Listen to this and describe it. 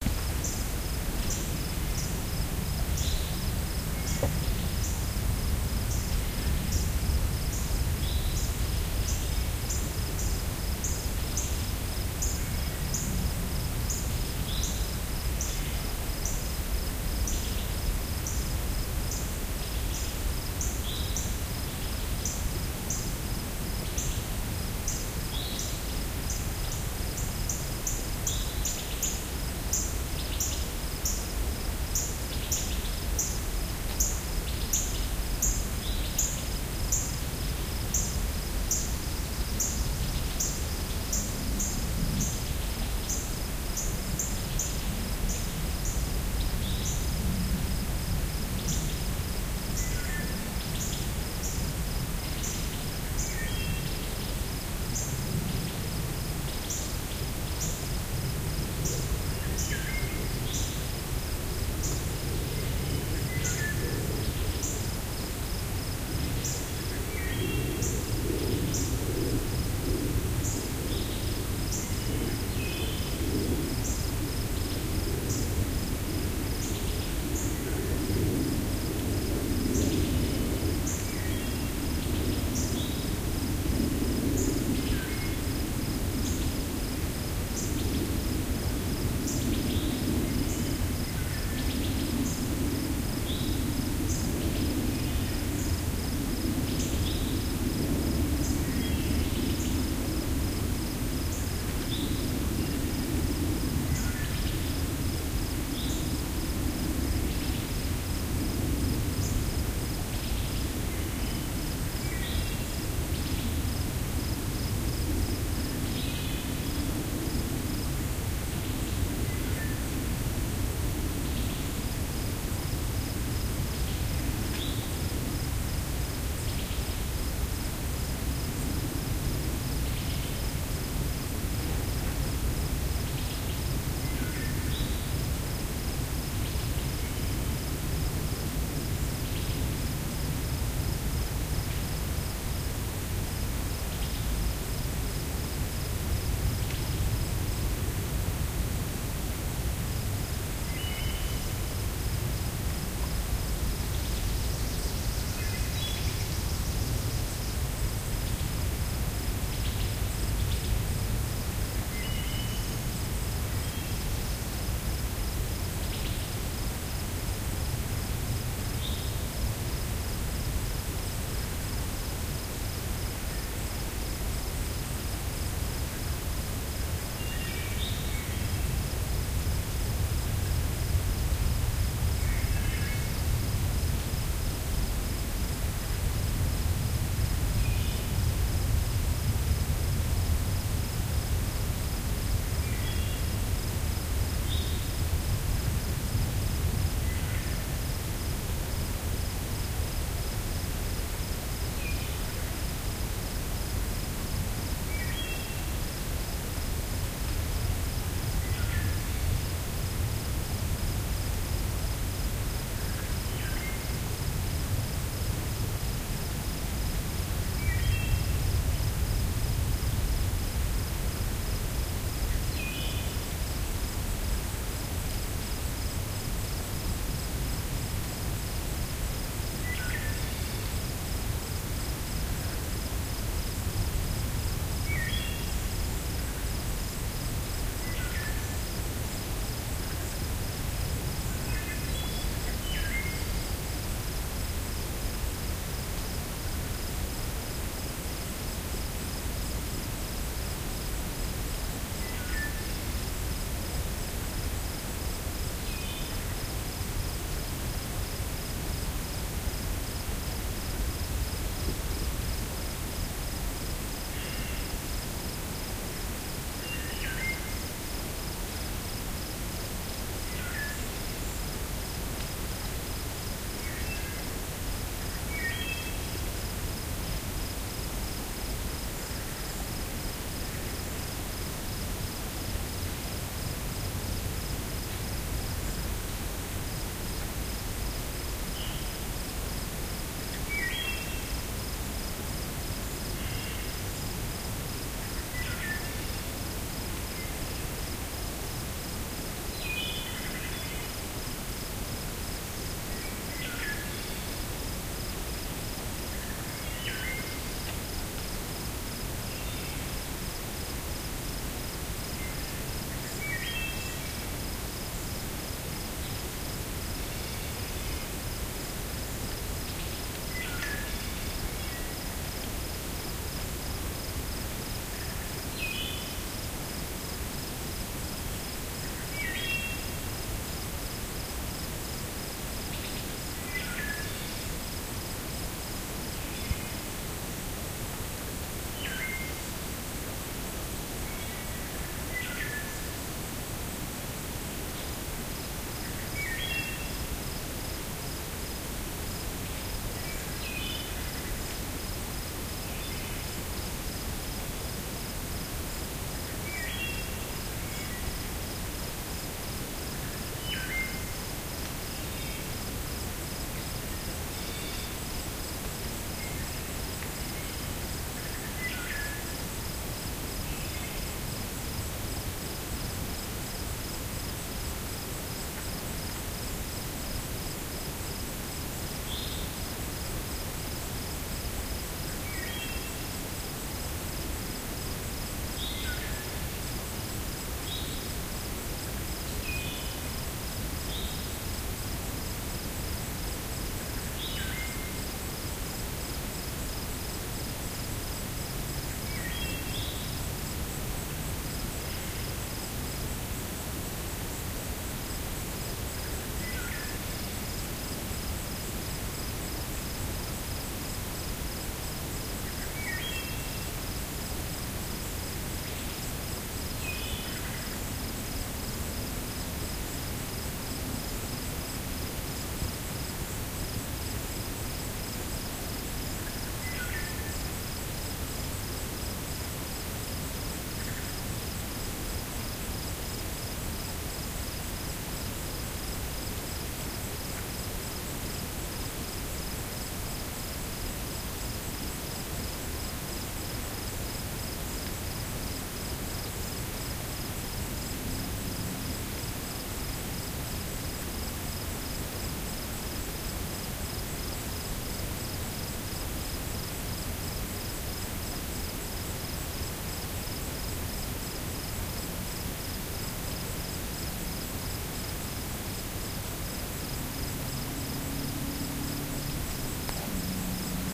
An overlook above a tree-studded valley - evening falls
As I rounded the bend in the gravel road, the woods opened out and I found myself on an overlook above a beautiful tree-studded valley with a stream winding through the grass. As the valley grew darker, most of the birds stopped singing. Distant thrushes were still audible, perhaps from another meadow where the top of the sun was still visible above the hills.
Recording date: July 15, 2013, early evening.
ambiance, birds, crickets, evening, field-recording, nature, unedited, west-virginia